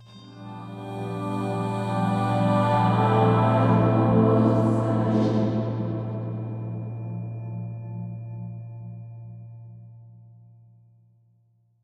Angelic voices, strings, and synthesised sounds morphed together to produce a strange plaintive sound. As choir a but higher in pitch. Part of my Atmospheres and Soundscapes pack which consists of sounds designed for use in music projects or as backgrounds intros and soundscapes for film and games.
ambience, choir, choral, church, cinematic, music, pad, processed, religion, synth, voice